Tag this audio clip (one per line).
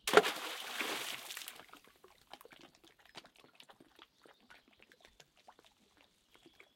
Waves
water
Field-recording
Nature
Rock